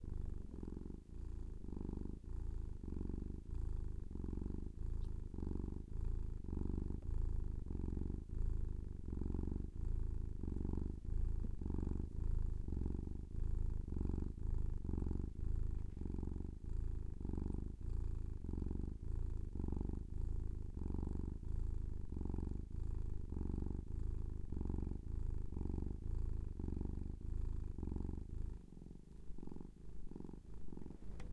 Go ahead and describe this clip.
Cat purring (credit to Penny the cat for excellent vocal work)
cat, feline, gato, kitten, purr, purring, purrs